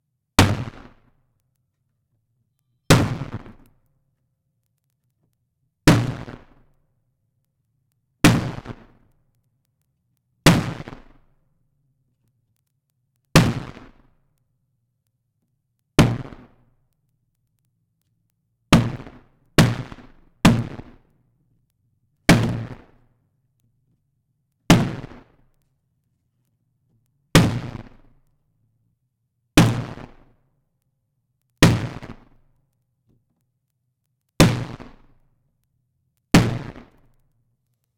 Wood Hit 01 Brittle
The file name itself is labeled with the preset I used.
Original Clip > Trash 2.
bang, boom, cinematic, distortion, drop, explosion, hit, horror, impact, industrial, percussion, percussive, pop, pow, processed, saturated, scary, sci-fi, shield, smack, strike, trashed